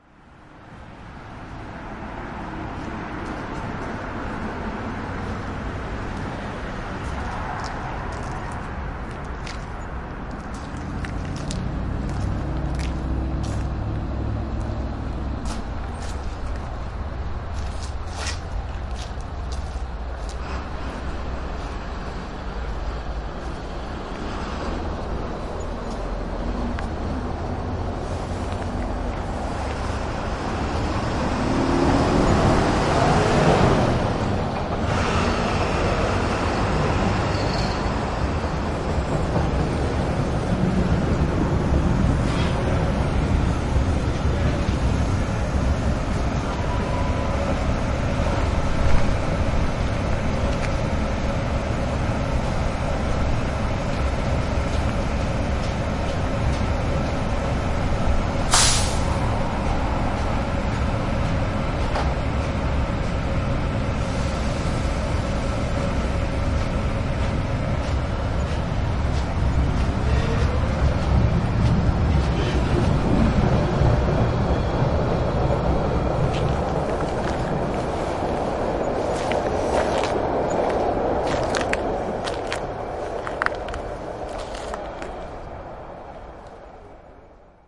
sounds at a train station